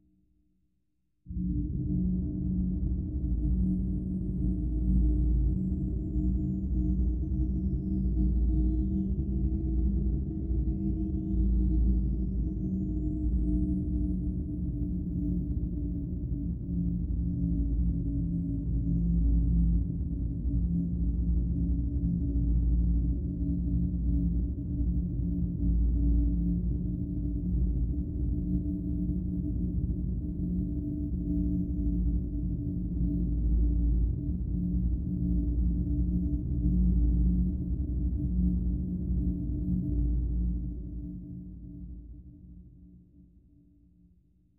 Low Pitched Drone Scary
A background sound design element used to create suspense in a horror scene. Very subtle, flowing modulation. Sounds similar to wind.